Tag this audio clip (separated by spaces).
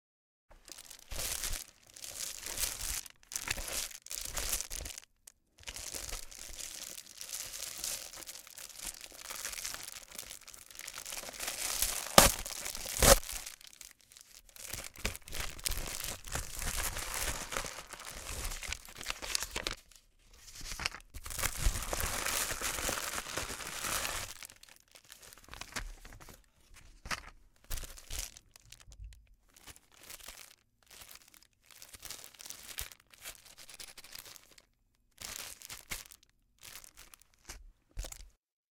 amazon bubble-mailer envelope foley handle open opening opening-package packaging perforation plastic product rip ripple rustle shake shipment shipping-material squish tap tape tearing ziplock